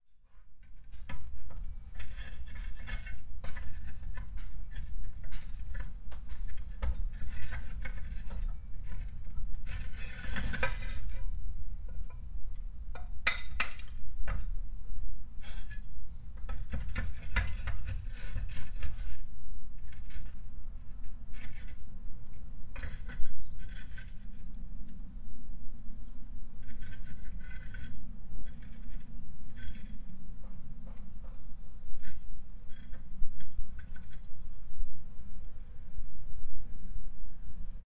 Recorded with a single Rode NT1-A microphone. I am not sure what these men were doing but there were some scraping/dragging sounds on the roof outside my window.
There are many aeroplanes where I live but I have tried minimising this with some mid-range EQing.